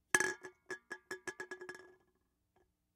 Empty soda can wobbling on a hard surface.
Foley sound effect.
AKG condenser microphone M-Audio Delta AP